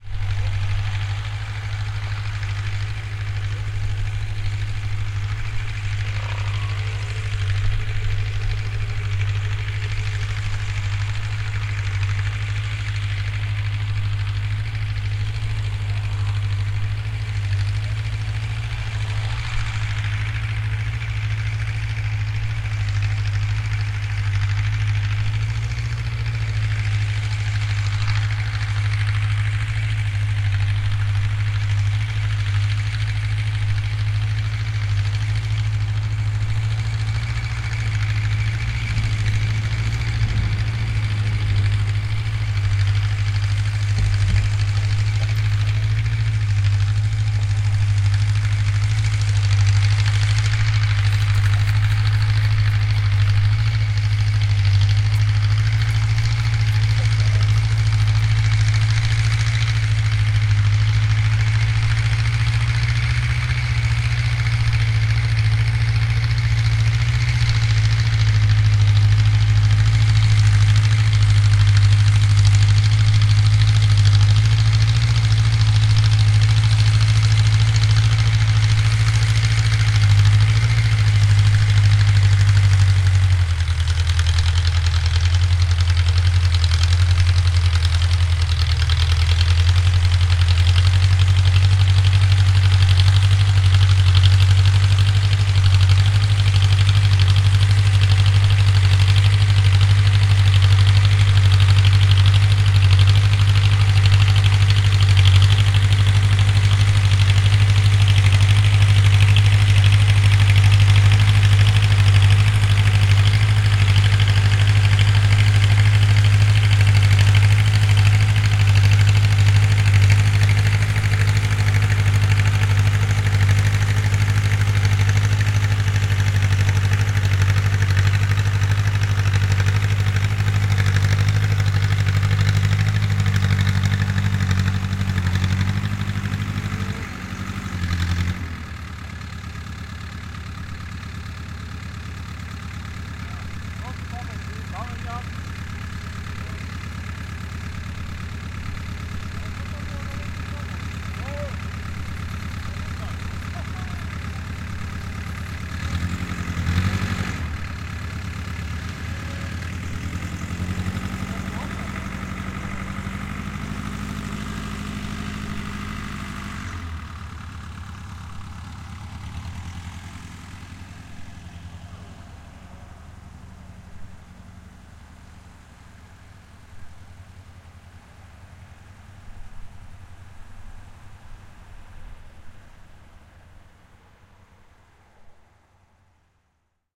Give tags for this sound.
agro; ambient; plowing; sounds; tractor

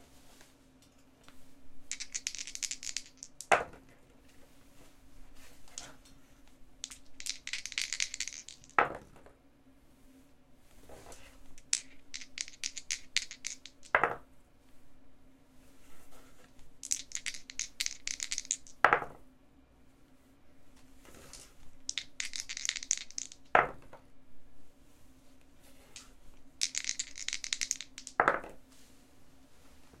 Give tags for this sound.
backgammon; dice

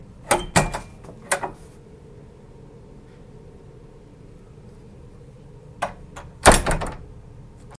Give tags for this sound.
maternity; hospital; field-recording

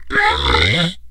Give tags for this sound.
instrument; daxophone

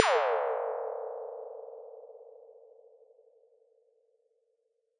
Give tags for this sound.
additive; exponential; harmonics; slope; spacey; synthesis

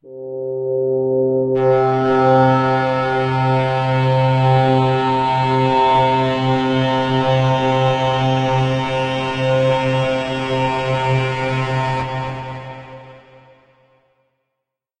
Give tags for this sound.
pad; multisample

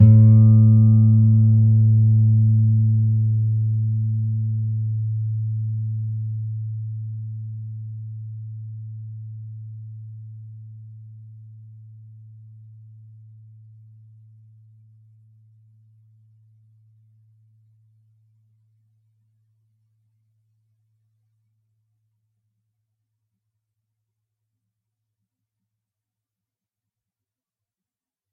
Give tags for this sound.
acoustic; guitar; nylon-guitar; single-notes

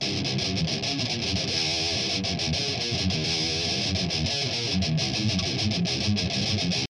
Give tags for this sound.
1; groove; guitar; heavy; loops; metal; rock

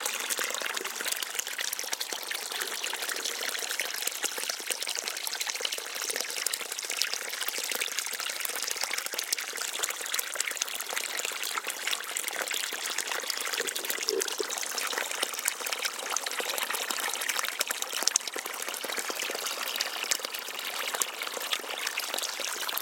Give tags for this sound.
bubble; Running-Water

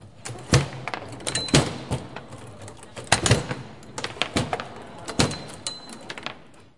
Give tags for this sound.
UPF-CS13; movement

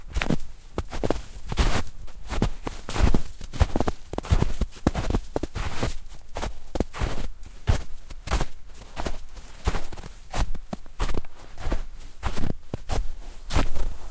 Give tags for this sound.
footsteps; snow

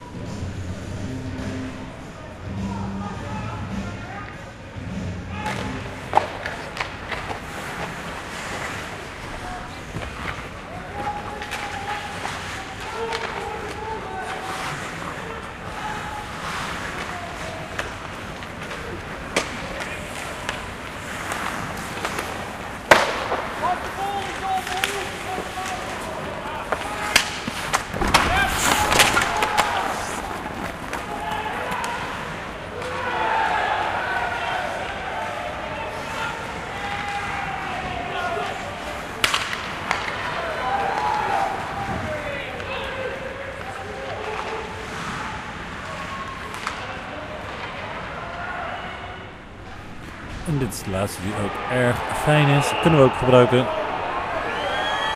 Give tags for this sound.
iceskating; match; teams

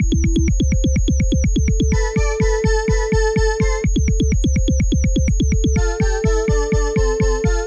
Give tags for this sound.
ambient; bass; Bling-Thing; blippy; bounce; club; dance; drum; drum-bass; dub-step; effect; electro; electronic; experimental; glitch-hop; humming; hypo; intro; loop; loopmusic; pan; rave; synth; techno; theme; trance; waawaa

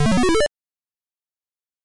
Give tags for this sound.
beep; blip; pong

